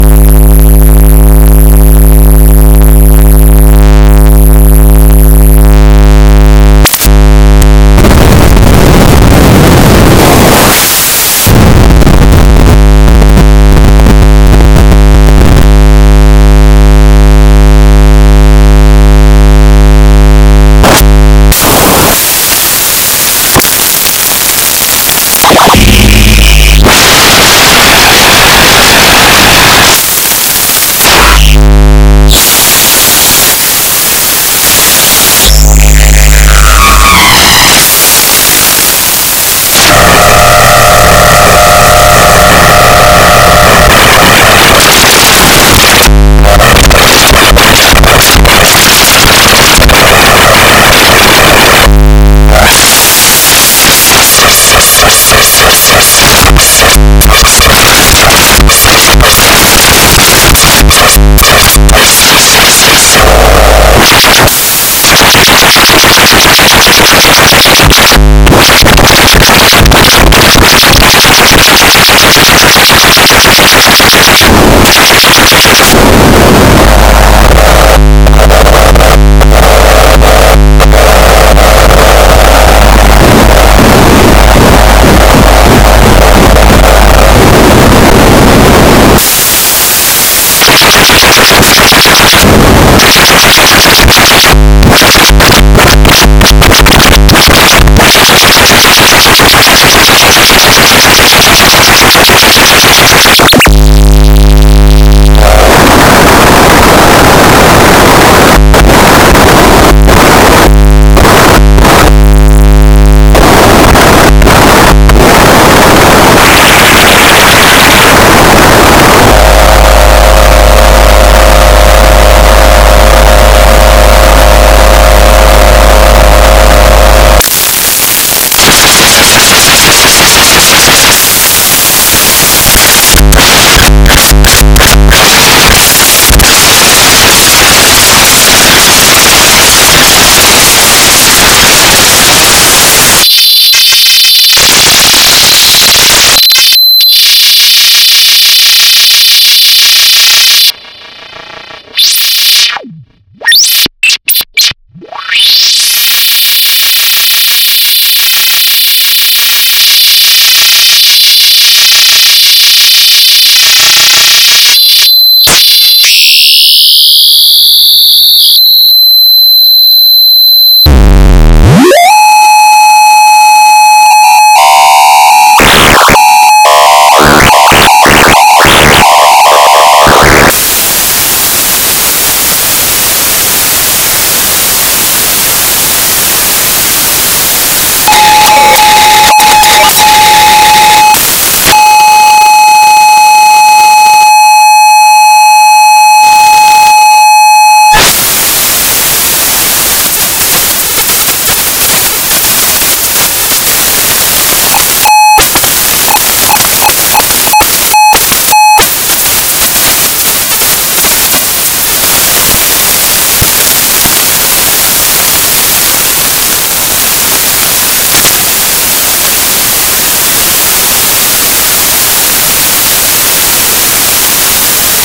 live modular jamb
annoying, digital, harsh, live, modular, noise, synth